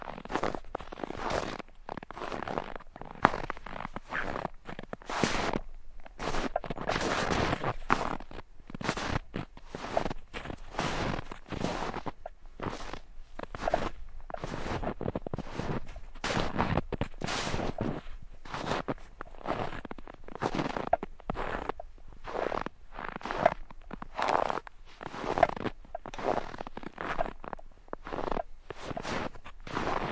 Walking in snow
Sounds of walking in deep snow
deep-snow, ambience, walking, field-recording